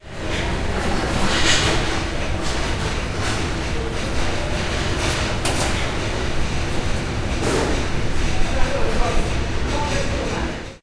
Back part of the cafeteria with sounds of dishwashers, other machines, and noise.
machines, UPF-CS14, field-recording, campus-upf, cafeteria